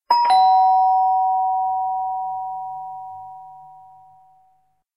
A stereo recording of a domestic two tone doorbell. Rode NT4 > FEL battery pre-amp > Zoom H2 line-in.